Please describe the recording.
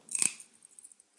Variation of the first crackle sound.
crackle, crack, rustle, crinkle, agaxly